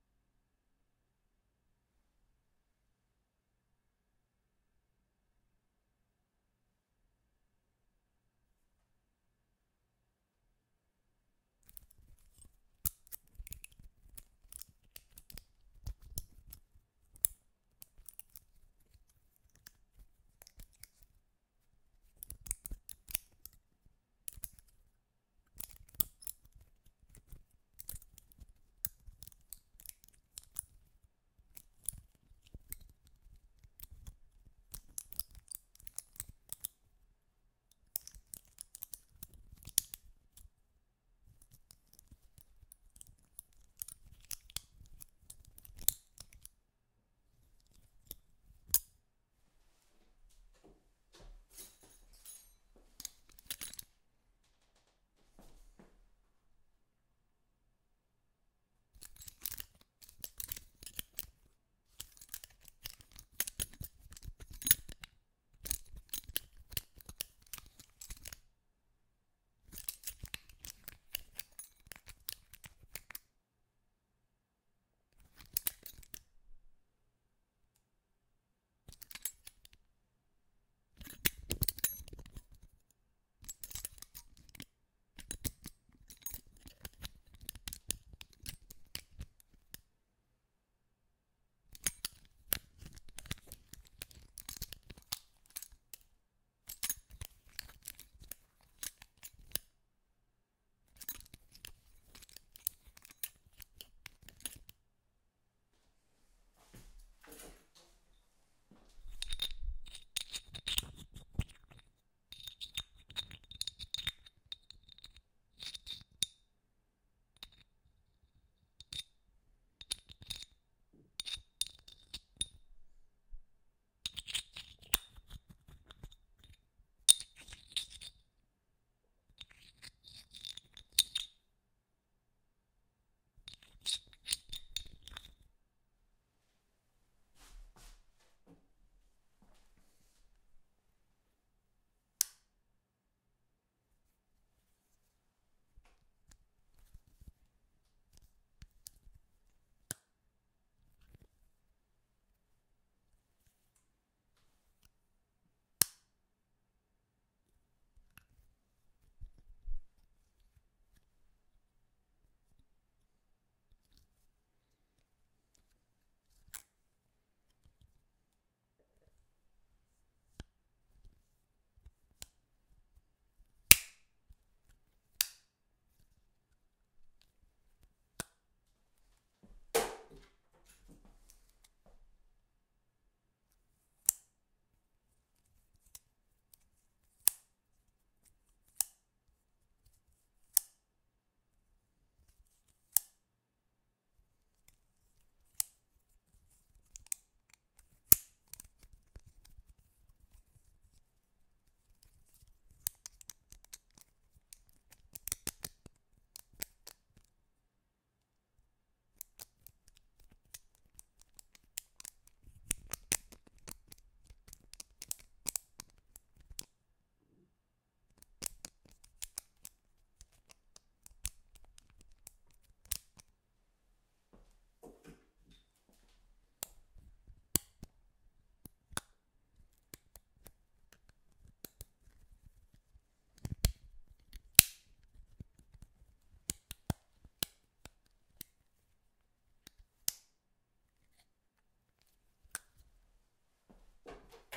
Weapons handling
Differents weapon manipulation created with various metal objets.
MANIPULATION, METAL, WEAPON